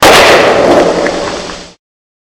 AK47 1 Shot
Range, Rifle